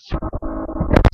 Mic Blocked6
You guys are probably wondering why I haven't been posting many sounds for the last month well number one so I can upload a lot of sounds at once and two I have been pretty busy with track and play practice. So now here is a bunch of sounds that were created by me either covering up or bumping my mic which I hope you will enjoy.